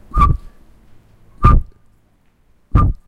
LEE RdR XX TI03 fup---fup----fup
Sound collected in Leeuwarden as part of the Genetic Choir's Loop-Copy-Mutate project.
City, Leeuwarden, Time